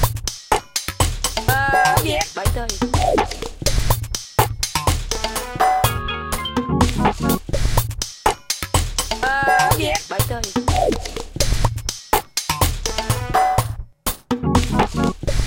Human funk 3
The sounds in this loop are not edited, only volume and/or length, so you hear the raw sounds. I cannot credit all the people who made the sounds because there are just to much sounds used. 124BPM enjoy ;)
loop, human, strange, groove